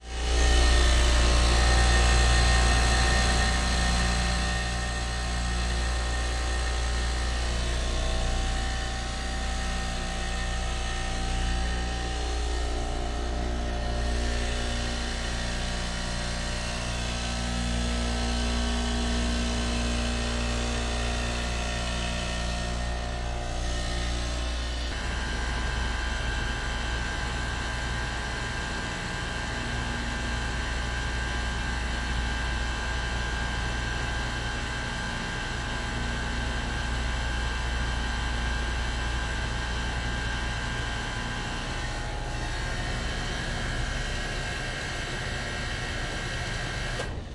Ventilation Furnace - Exterior Academic Heating Unit
Furnace / Ventilation unit outside of a university building. Electronic-style hum / whirring sound. Good for industrial ambience.